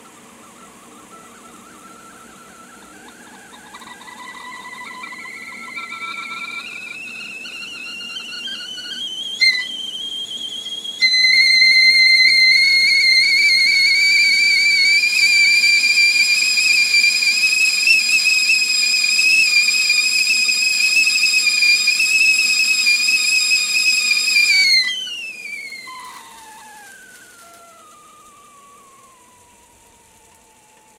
HOME KITCHEN KETTLE WHISTLE
hiss, home, house, kettle, kitchen, whistle